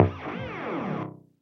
Alien Weapon 020 echo
Sounds like an alien weapon, laser beam, etc.
Processed from some old experiments of mine involving the guiar amp modelling software Revalver III. These add some echo added for extra cheezy sci-fi effect.
Maybe they could be useful as game FX.
See pack description for more details.
weapon, arifact